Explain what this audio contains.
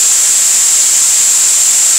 Analogue white noise BP filtered, center around 7.5kHz
Doepfer A-118 White Noise through an A-108 VCF8 using the band-pass out.
Audio level: 4.5
Emphasis/Resonance: 9
Frequency: around 7.5kHz
Recorded using a RME Babyface and Cubase 6.5.
I tried to cut seemless loops.
It's always nice to hear what projects you use these sounds for.